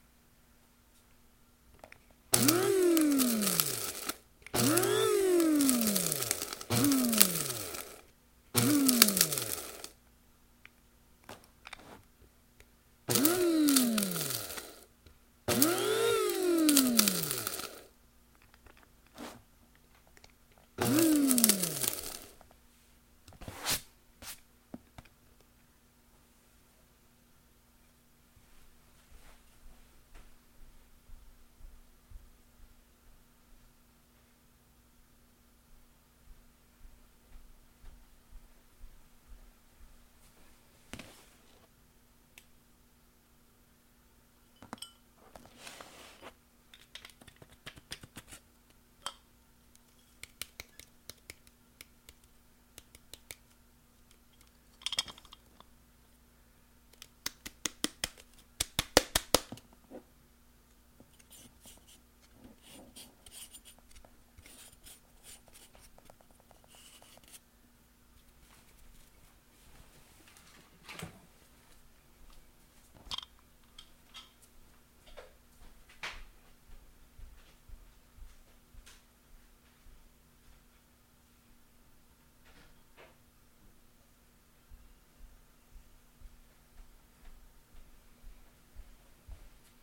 Grinding more herbs in a coffee grinder.
coffee, grinder